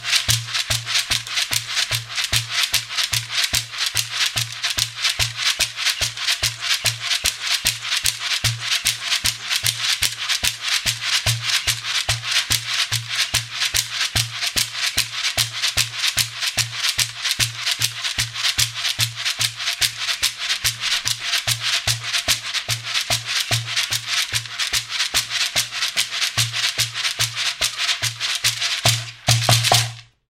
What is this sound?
Very large Yoruba Shekere recorded with AKG C414 (Cardioid) with Fredenstein preamp and a little compression.
Africa, Beads, Cowrie-shells, Gourd, large-shekere, London, Nigeria, Shaker